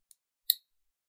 Snaplock Caribiner
A dual mono recording of a snaplock carabiner being snapped . Rode NTG-2 > FEL battery pre-amp > Zoom H2 line in.
mono, carabiner, snaplock, crab, biner